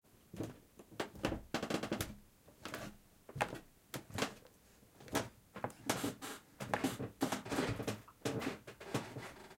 Walking at stairs sound fx.